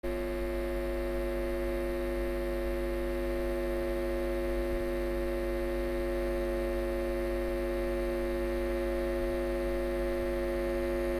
Electric humming sound, recorded with a Zoom H1.
buzz, electric, electricity, Hum, Humming, noise, Sound